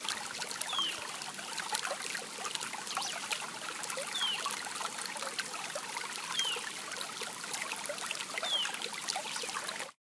A recording from my iPhone of a River in the Cordoba´s Sierras in Argentina, you can hear some birds to on the background.
Grabación realizada con mi Iphone de un rio de las Sierras en Argentina, se pueden escuchar algunos pájaros en el fondo.
stream, ambient, river, birds, nature, naturaleza, outdoors, pajaros, cordoba, rio